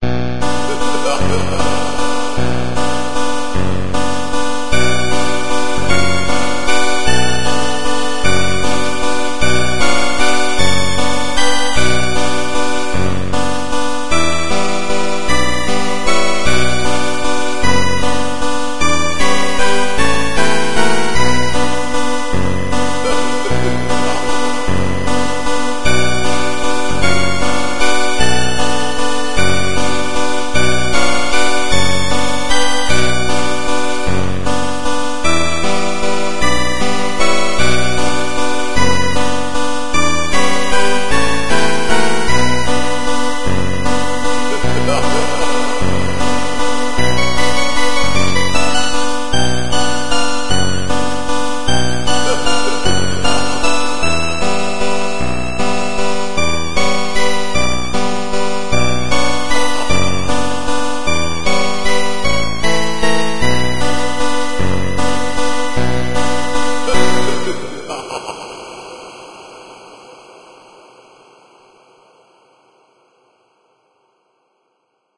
Halloween 8-bit